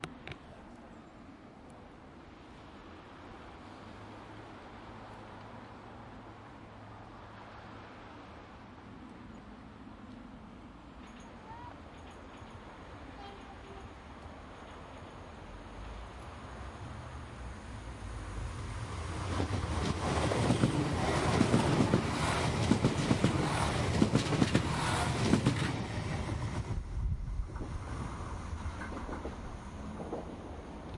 beach and longer train
this is recorded with a zoom h2n at killiney (co dublin, irl) dart station
you first hear the beach in the back ground then the train passing
sea, shore, waves, beach, dart, train, seaside